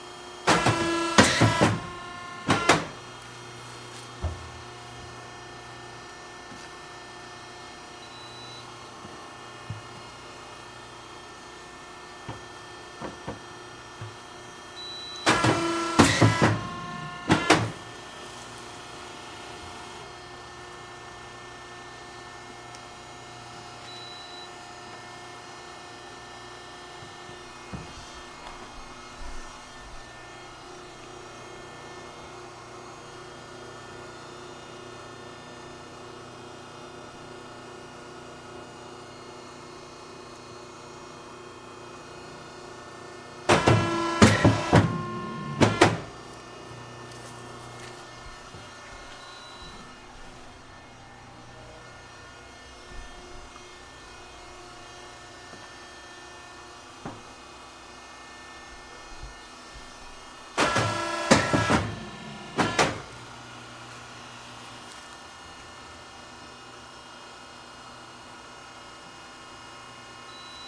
paper cutter machine newspaper hydraulic or air pressure mechanical 4 cuts